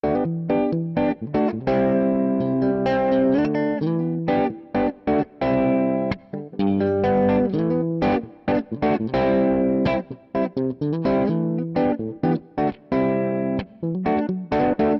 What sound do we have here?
House Guitar Loop 3
Funky guitar loop